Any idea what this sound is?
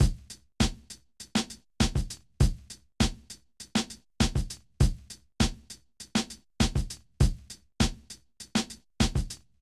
all purpose groove 100bpm
A four bar drum loop with a funky swing. Created in Reason 1 (!) using the abstract kit.
drums, funk, loop, natural, reverb